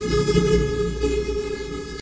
Square wave rising from A to slightly sharp with some modulation thrown in rendered in Cooldedit 96. Processed with various transforms including, distortions, delays, reverbs, reverses, flangers, envelope filters, etc.
synthesis, synthetic, synth, wave, digital, square, synthesizer